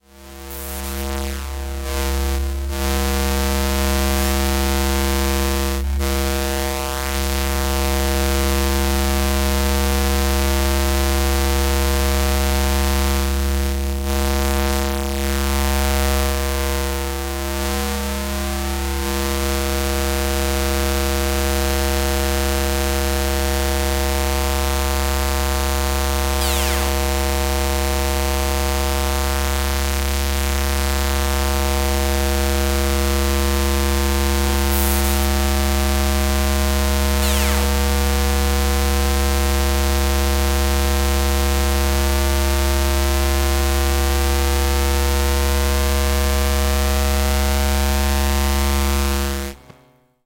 Electromagnetic Mic on XBox Battery
I recorded some internal workings of different things, the XBox battery being one.
hum, electrical, electrocmagnetic, battery, buzzing, low